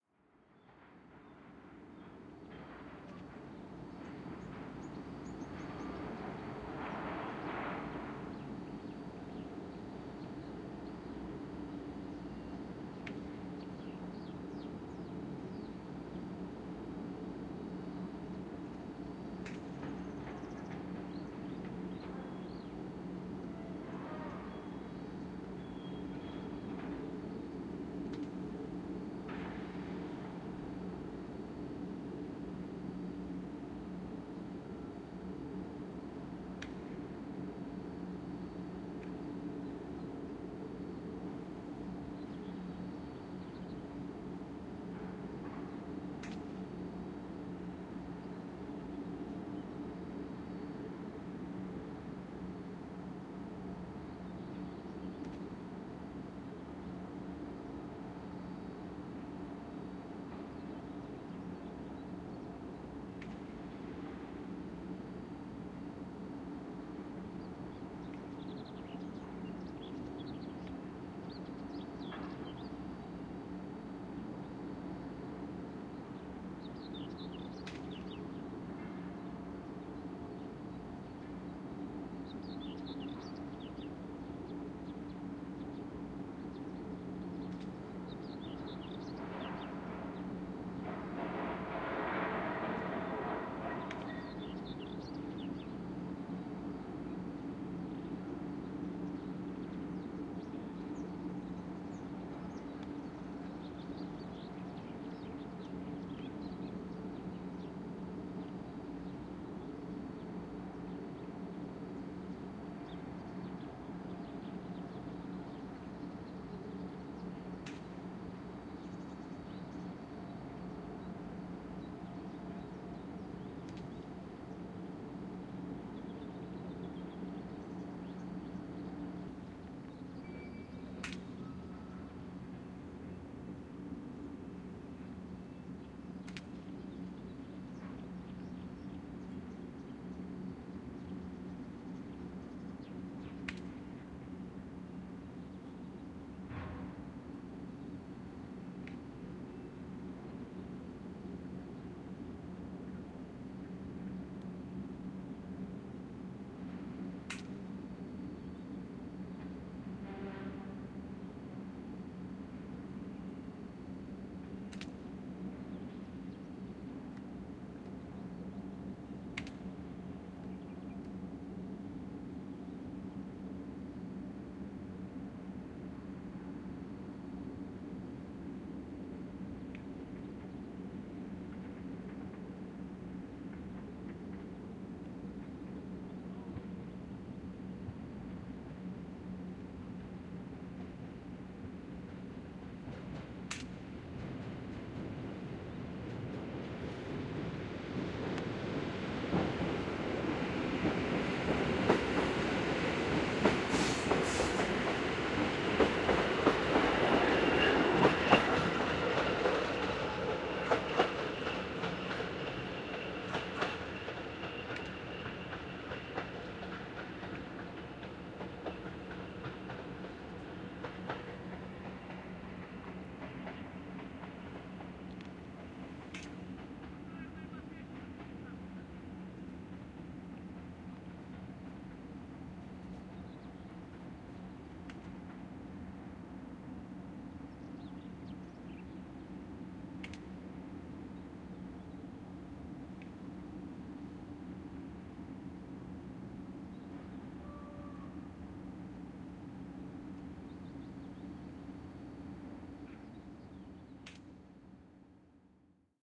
09.06.2013: about 13.00. Ambience of works in factory, subtle sounds of the meadow, passinh by train. Recorded from small overpass perspective.
Marantz PMD661 MKII + shure VP88 (paramteric equalizer to reduce noise, fade in/out)